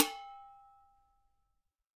Stomping & playing on various pots